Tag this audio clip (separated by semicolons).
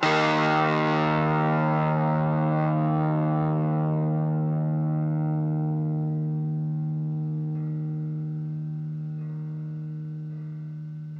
distortion; chords; miniamp; amp; power-chords; guitar